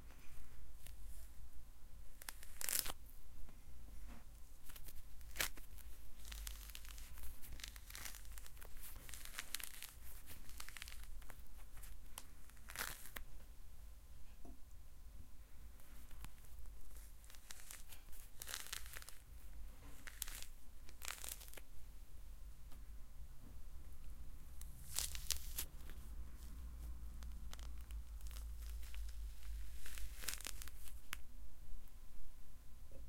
peeling Orange
an orange, reluctantly having its skin peeled off
peeling, flesh, dry, orange, skin